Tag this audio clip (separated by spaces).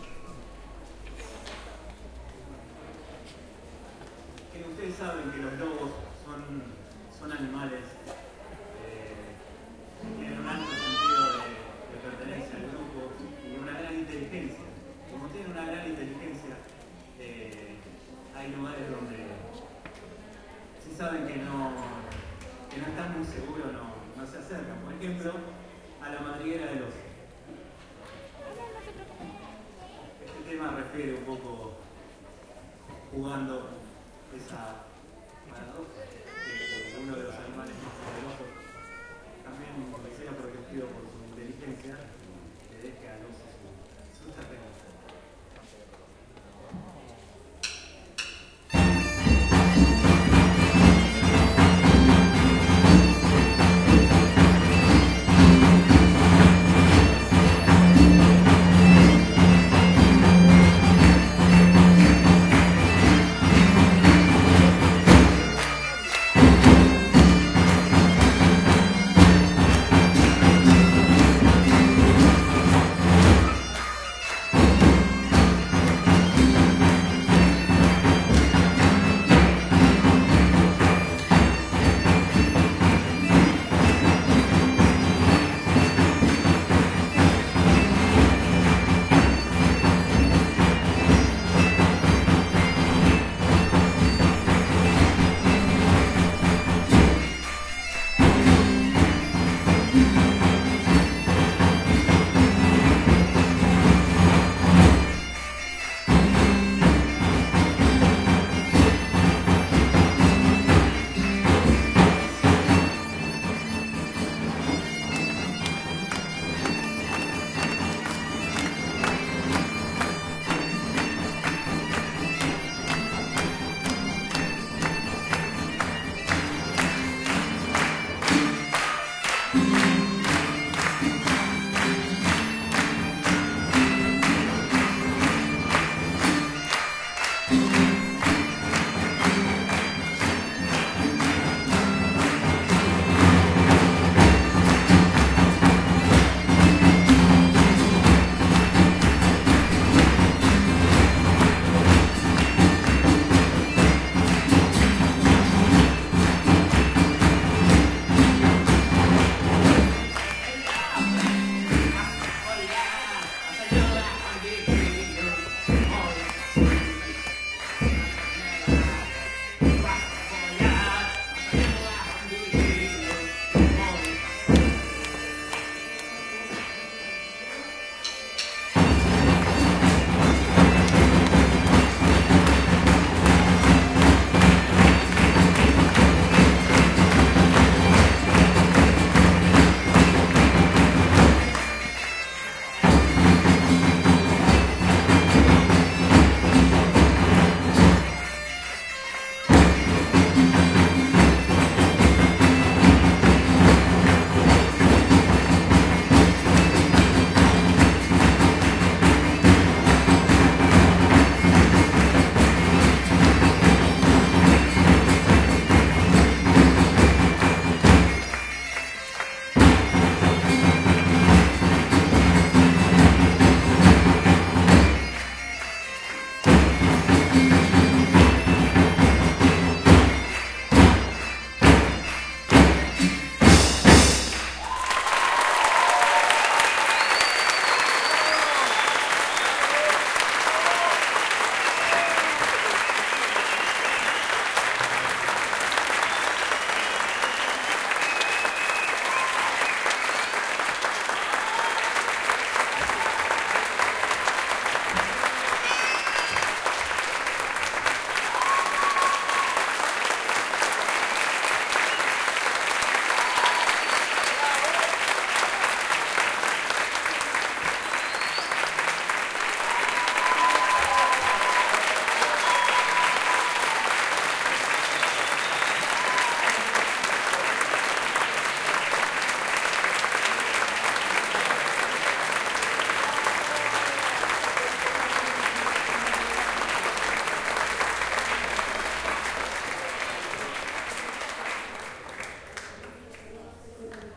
old live medieval music